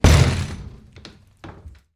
door wood kick open rattle creak
door, kick, wood